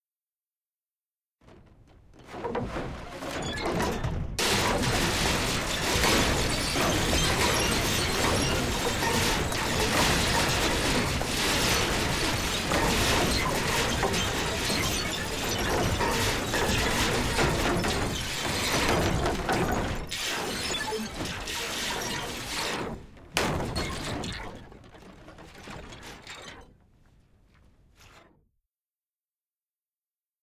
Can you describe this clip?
Debris Sifting wet
Sifting debris drowned in digital artifacts a "matrix-y" theme if you will :)
remix, debris